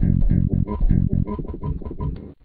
11297 Raw-Glitch-HF
break, noise, loop, glitch